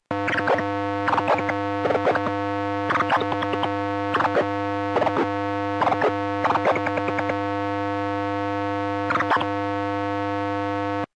Pointing the Colorino and my DirecTV remote at each other. the modulated light sounds similar to what you hear when you put a remote near an AM radio to hear it's processor. Yes, it does pick up near infrared light, and even will react to a space heater. I'll upload the AM radio bit at a later date. Repeatedly pressing the volume buttons which makes the remote talk to the old Sanyo TV. It sounds a bit different from when the Sanyo remote does it, there's a little fast DirecTV blip at the beginning, maybe telling the DirecTV box to ignore the next command "I'm gonna talk to the Sanyo now". It's a bit of a trick getting the units lined up perfectly, so the pitch will vary. I made it do that anyway to give you a good example of what it sounds like at many different light levels.
the Colorino Talking Color Identifier and Light Probe produces a tone when you hold down the light probe button.